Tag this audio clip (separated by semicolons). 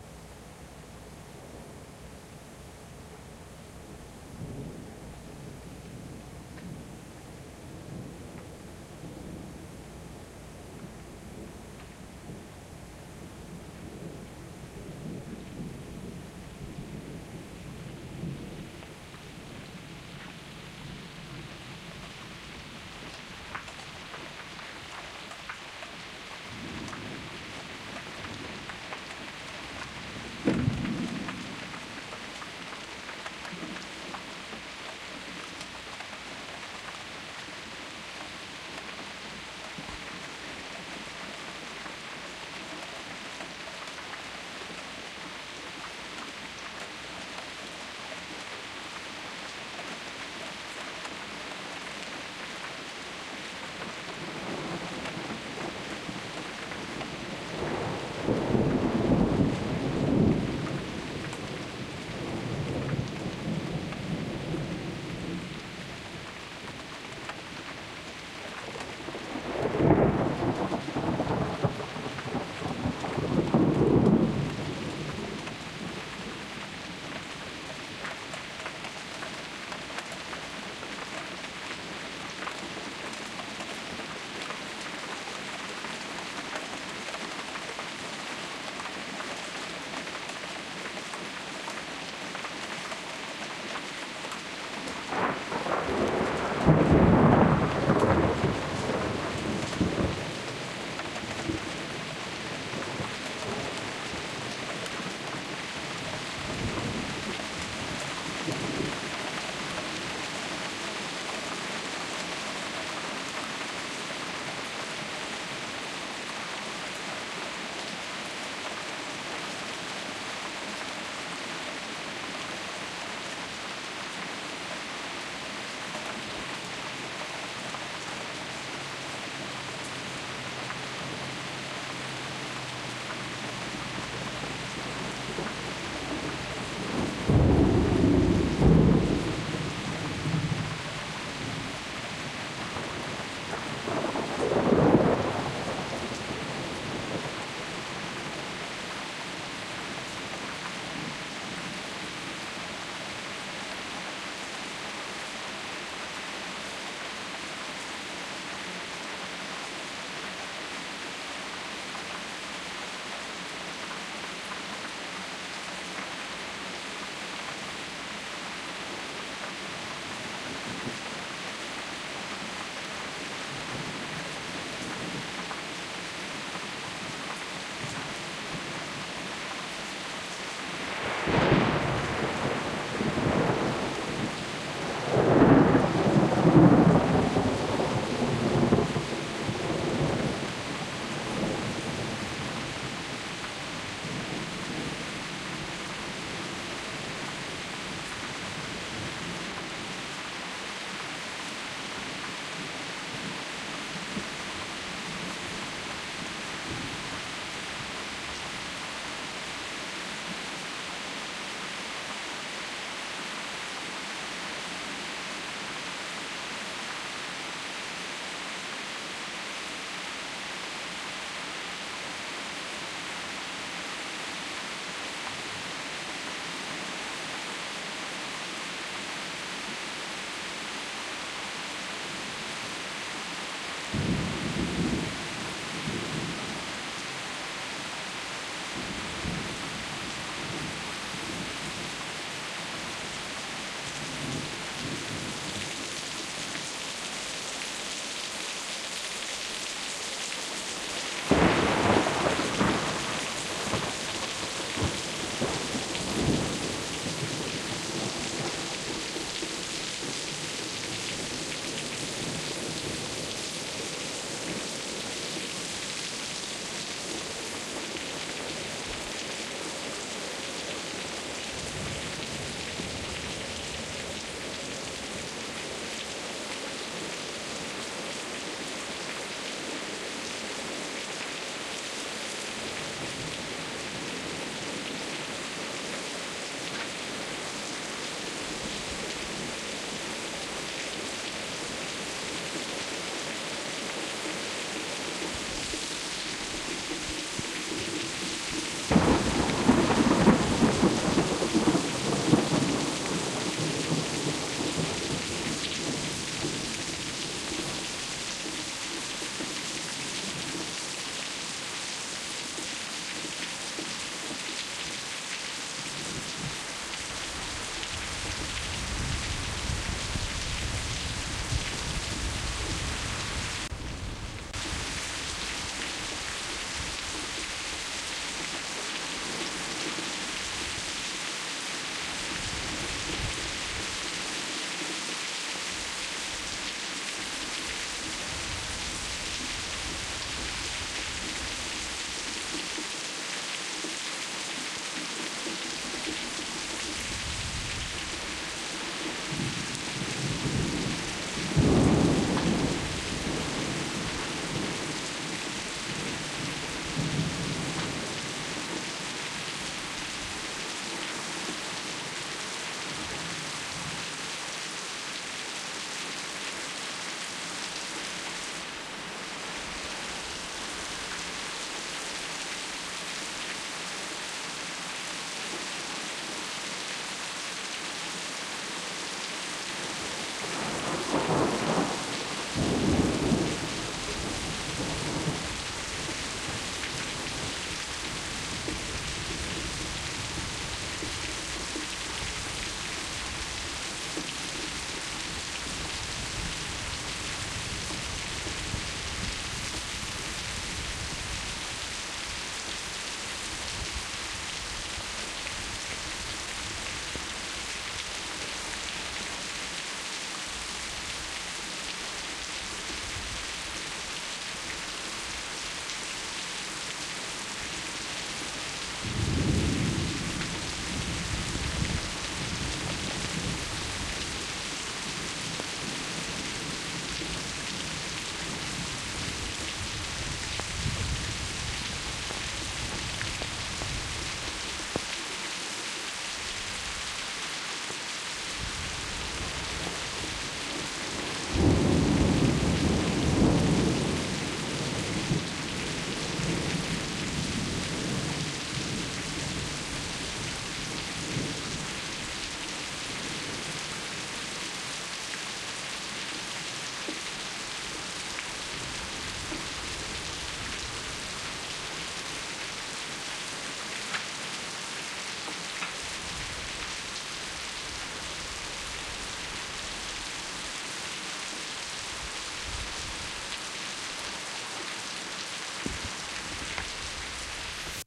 thunderstorm; field-recording; rain; soundeffect; thunder